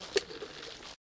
A trip to the movies recorded with DS-40 and edited with Wavosaur. Audience ambiance before the movie.